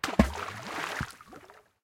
WATRSplsh rock splash 32 TK SASSMKH8020
Throwing various sized rocks into a large lake. Microphones: Sennheiser MKH 8020 in SASS
Recorder: Zaxcom Maxx
effect, gurgle, lake, rock, splash, sploosh, water